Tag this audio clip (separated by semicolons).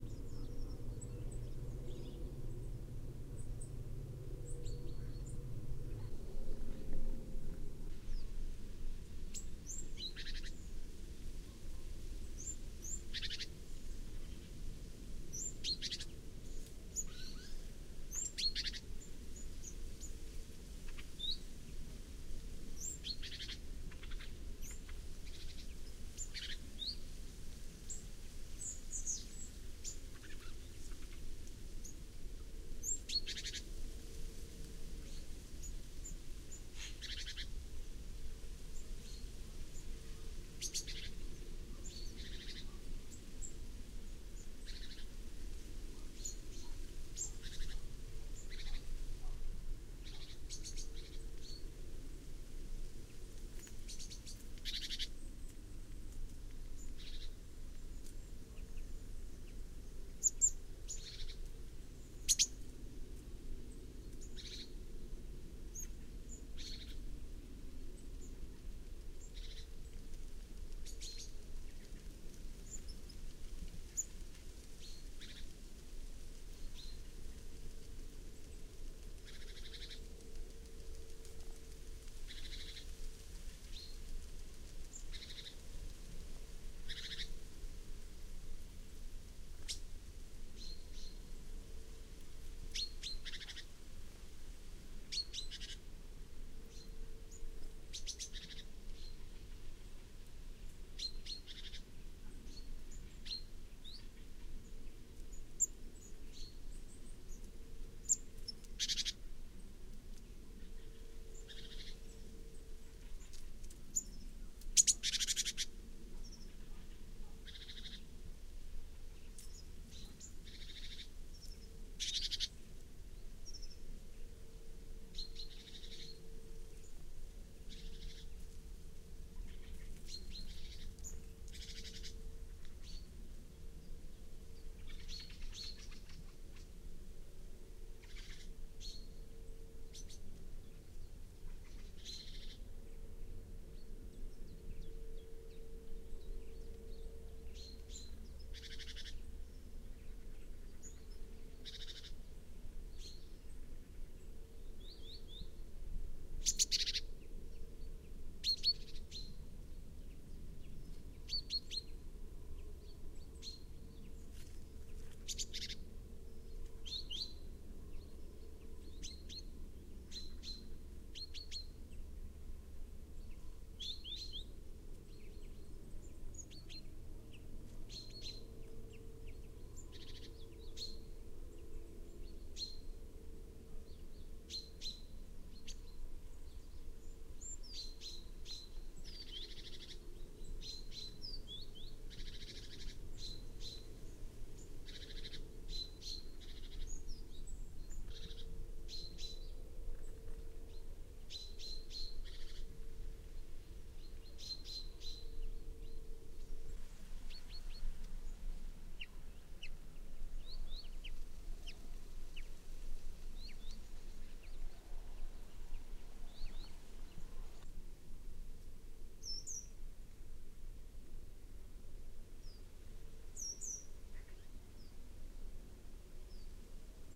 Forest; Bird; Autumn